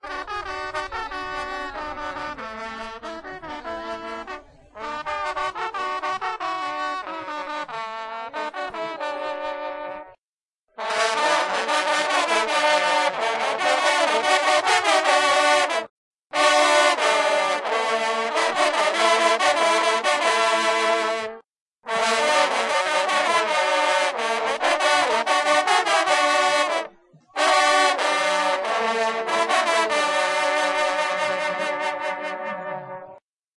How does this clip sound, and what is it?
Hunting horn players recorded at a dog and hunting festival in La Chatre (France)
berry, france, horn, hunting, tradition